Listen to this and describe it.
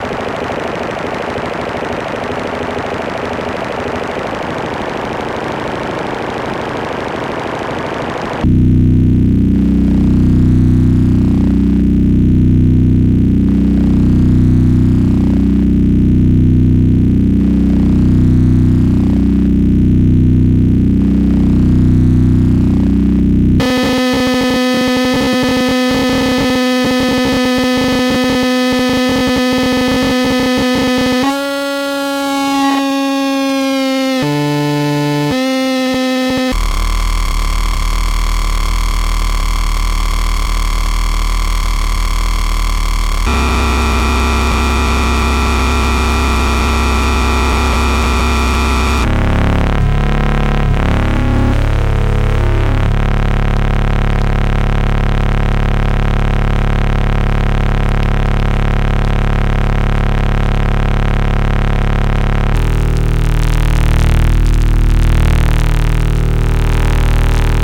Formatted for the Make Noise Morphagene.
This reel consists of a number of drones and modular synth sounds separated into splices.
All sounds made with Hertz Donut and Piston Honda.